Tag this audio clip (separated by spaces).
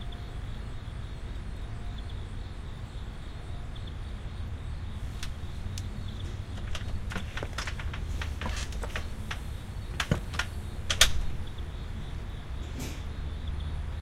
creak door metal outside slam steps stone